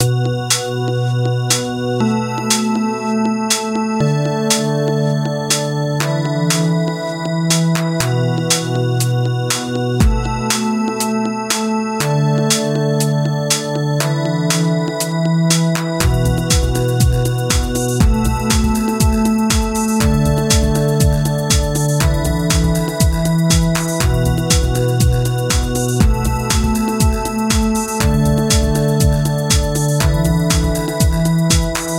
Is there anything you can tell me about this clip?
dance loop
A short and simple, perfectly looped rhytmic dance track.